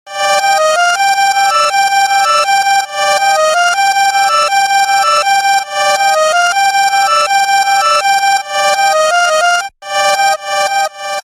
Kind of eerie but reminds me of Egypt.